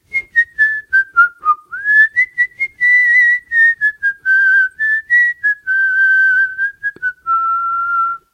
A man is Whistling, Whistle